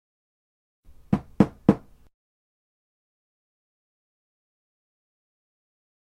door knock
Three knocks on a door - appeared in Ad Astral Episode 4 "DREAM GIRL".
knock, swift, three, door